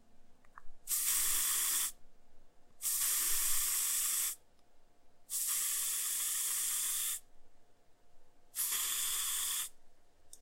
Extended bursts of an aerosol spray.